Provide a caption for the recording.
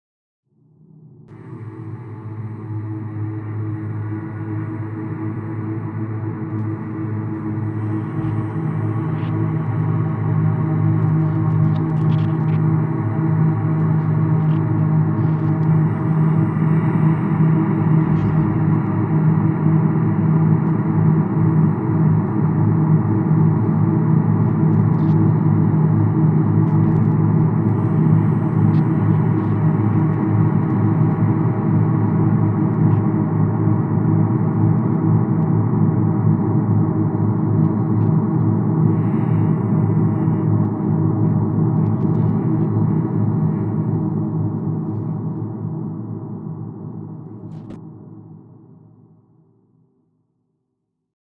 planet sound
Ambience for an alien planet. Ominous droning tones, deep, rumbling, and vaguely choral.
Recording Credit (Last Name): Frontera
alien, ambience, atmosphere, drone, futuristic, planet, sci-fi, soundscape, space